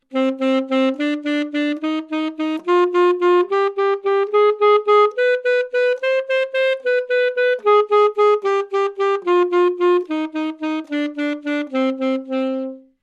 Sax Alto - C minor
Part of the Good-sounds dataset of monophonic instrumental sounds.
instrument::sax_alto
note::C
good-sounds-id::6606
mode::natural minor